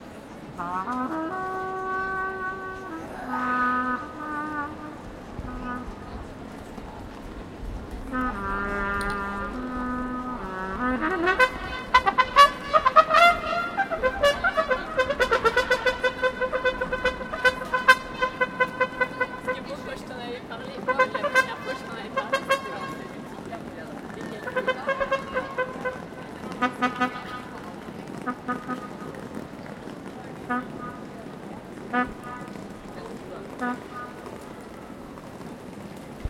trompettiste dans la rue, Paris
Recorded in Paris, front of Musée Georges Pompidou. A street-musician playing trumpet.